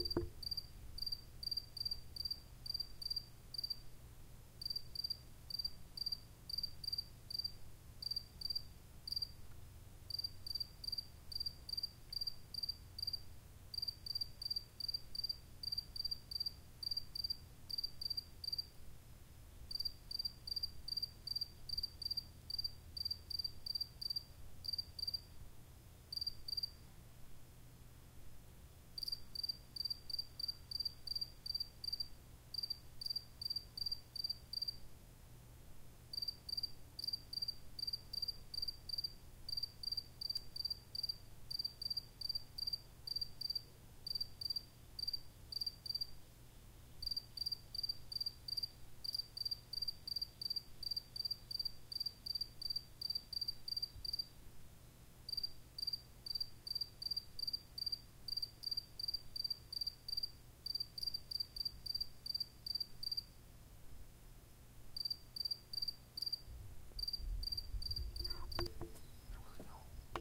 Just a single cricket chirping late at night. Recorded using my Zoom H4n with it's built-in mics, XY array at 90 degrees. Simple!
nature, cricket, field-recording, insect, chirp, crickets, evening, night, bug